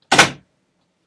Door Close
door, quick, slam